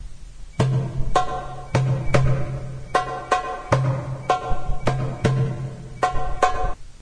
Qaim Wa Nisf Msarref Rhythm
Two cells of Qáim Wa Nisf msarref (light) rhythm of the moroccan andalusian music
andalusian, arab-andalusian, compmusic, derbouka, moroccan, msarref, percussion, qaim-wa-nisf, solo